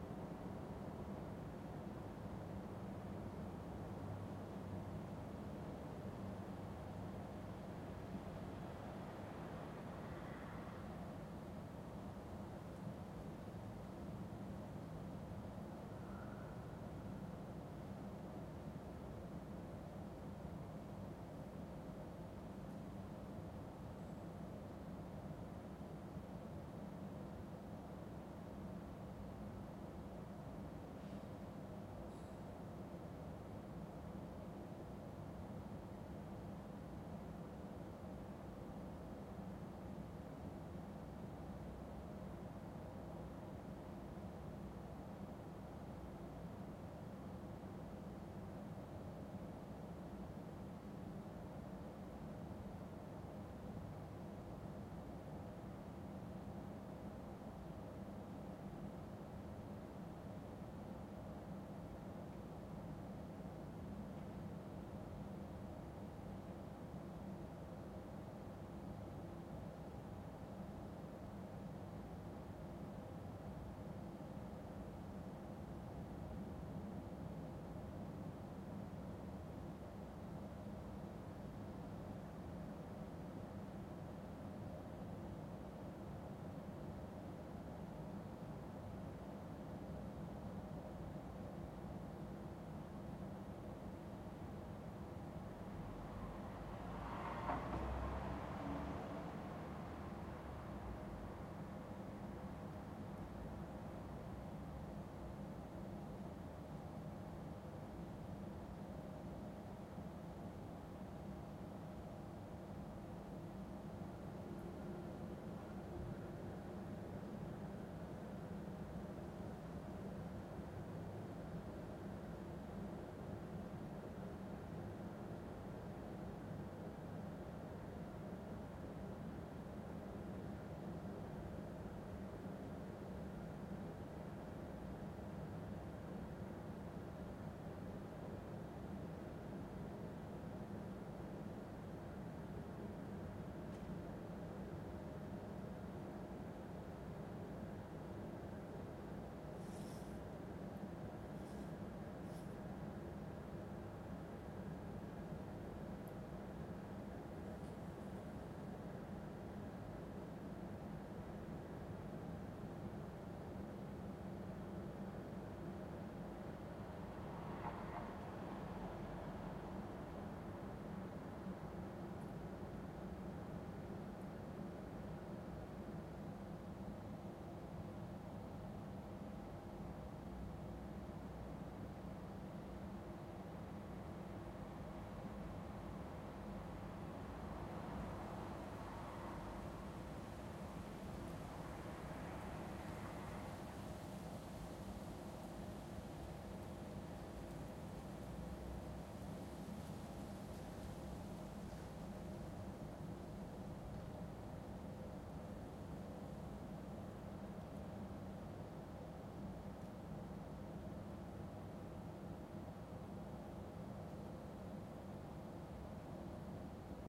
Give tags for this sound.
AMB city field-recording Ms night stereo

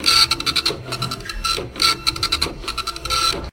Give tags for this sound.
ambient effect printer printer-loop

